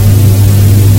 Laser/Machine humming
A mixture of different noise types (Pink and White) to create a looping, static-like sound. Could probably be used for lots of games or animations, especially sci-fi ones. Uses the "Zero" (no credit) license.
computer, firing, humming, laser, machine, noise, sci-fi, static